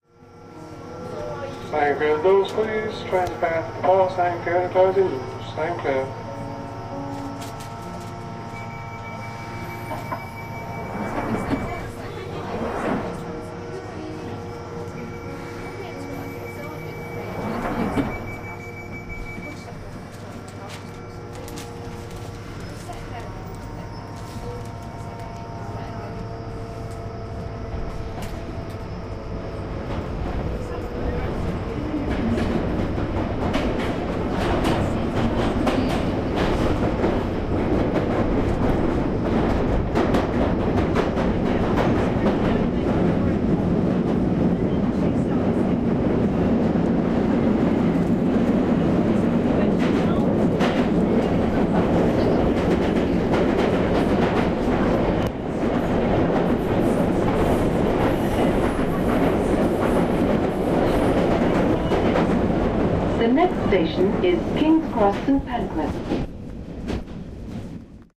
London Underground Ambiance
On-train ambience
announcement, field-recording, london, london-underground, metro, subway, train, tube, underground